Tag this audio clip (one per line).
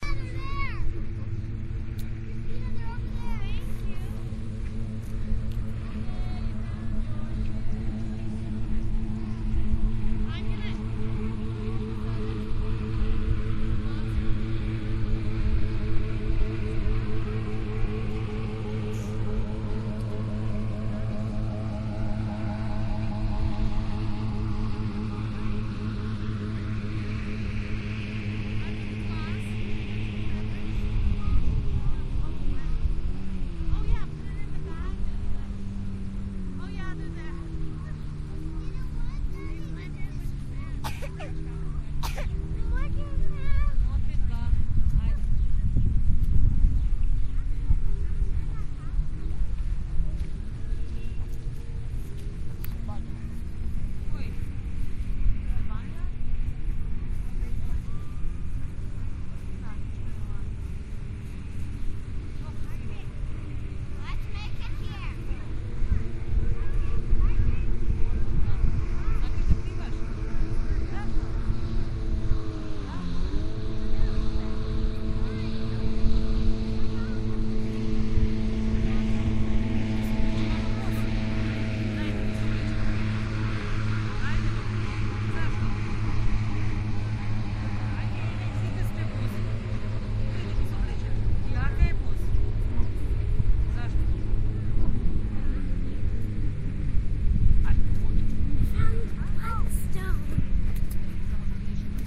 ambiance
ambience
atmosphere
beach
binaural
jet-ski
jetski
quite
stereo